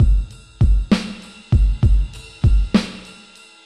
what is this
4 Beat 11 Triphop
soft jazzy drum loop
beat, drum-loop, drums, Trip-hop, Triphop